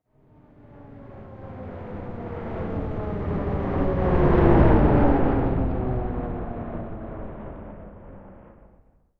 Audio from my new sound effects library - "Abstract Spaceships" - with various spaceship sounds created without using jet plane recordings. Several metal tins were scraped with a violin bow, combined together, and pitch shifted to create this ascending spacecraft sound.
An example of how you might credit is by putting this in the description/credits:
The sound was recorded using a "H6 (XY) Zoom recorder" on 27th January 2018.